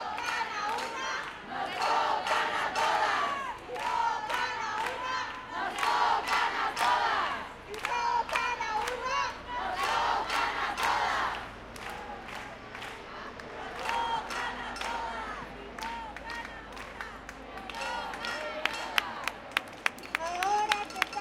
"El violador eres tú": el potente himno feminista nacido en Chile. Cantado en una manifestación feminista en Valencia, España.
Sound hunter from Valencia, Spain